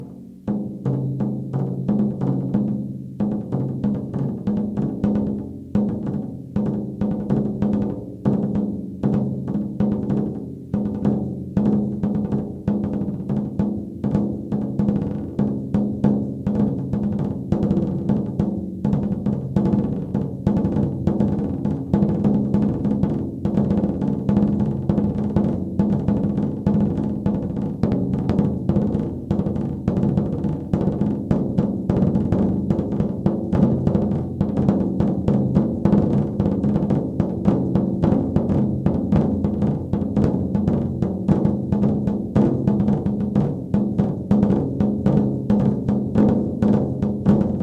Fear! Scottish highlanders are coming.
Played on a drum that does not exist among the professionals. It has a barrel-like shape and a very special skin.
emotions, feelings, mood